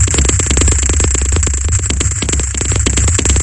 Played the sample over itself a few times and added two delay effects and an LP filter. 139.783 bpm. 2 measures
glitch, rhythmaker